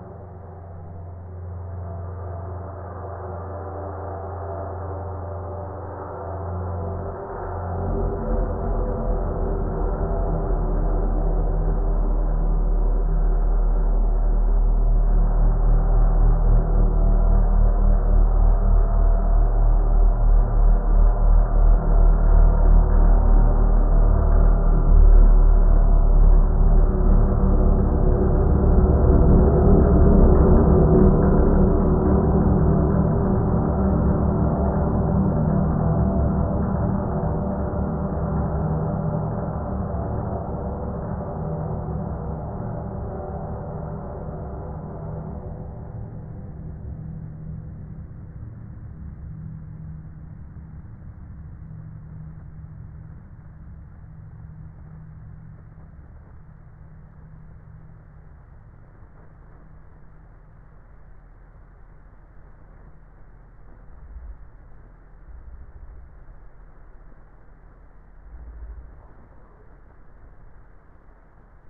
A few very strange tracks, from a down-pitched cymbal.